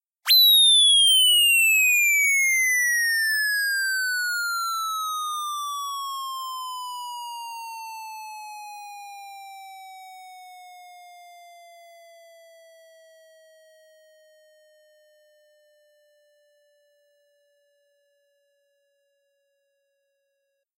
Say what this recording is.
Falling, Comedic, A
Audio of a descending square wave constructed using the Massive synthesizer.
An example of how you might credit is by putting this in the description/credits:
Originally created on 22nd September 2016 using the "Massive" synthesizer and Cubase.
comedy, falling, square, synth